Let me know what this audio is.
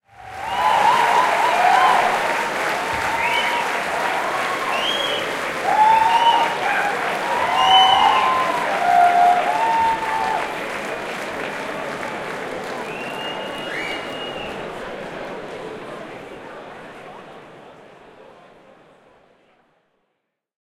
Crowd cheer
Crowd clap and cheer at concert.
applause, cheering, applaud, entertainment, clap, crowd, audience, cheer, clapping, applauding